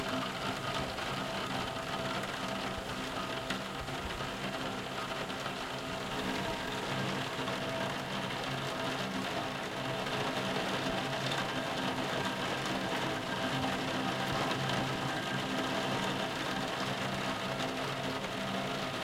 Rain on a window recorded from inside
Equipment used: Zoom H4 internal mics
Location: Karlskrona, Sweden
Date: 23 June 2015